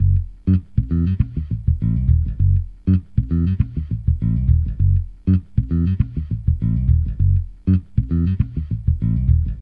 Funk Bass Groove | Fender Jazz Bass